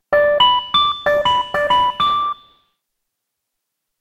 LEBER Zoé 2014 2015 Xylo
I generated only three music notes and played with it to make it sounds dynamic, like a xylophone.
I played on the envelope, the sound fading, and the phaser. I also used the reverb and the leveler to apply -10dB.
acute, synthesized, toy, xylophone